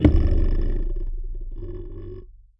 tweezers boing 9
Tweezers recorded with a contact microphone.